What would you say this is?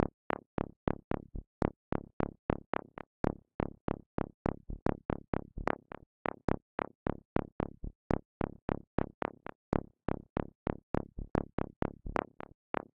Made with Synthmaster in the Bitwig Piano Roll. In my Drum Pack is drum beat which is very compatible to this piece.